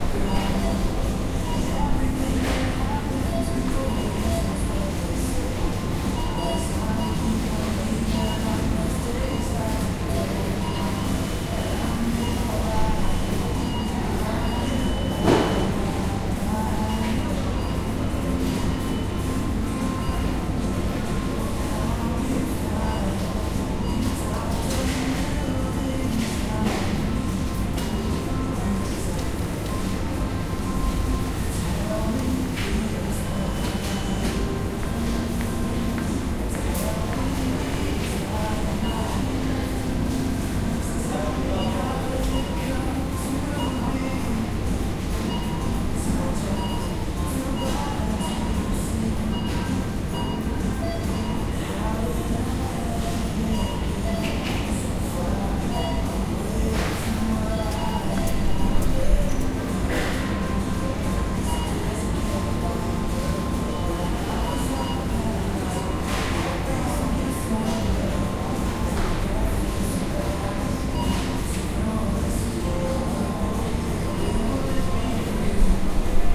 Supermarket Ambience
One minute of the ambience in the supermarket.
Supermarket, Crowd, Checking, Store, Selling, Ambience, Atmosphere